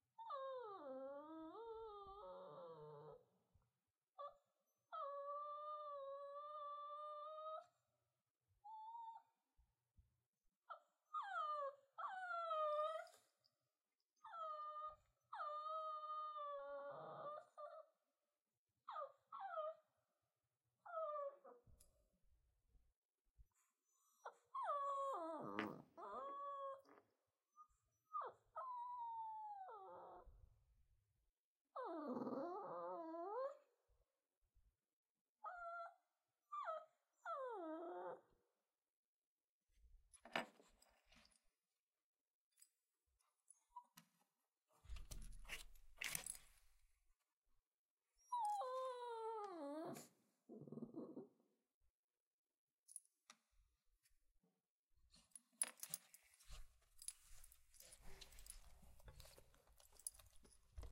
Whining Puppy (Shih Tzu)
A recording of my puppy whining, recorded with a zoom h4n.
I placed him a dark room with the door cracked and he eventually figured out he could just push the door open.